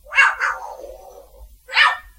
African Grey parrot making explosion sound
african, explosion, gray, grey, imitation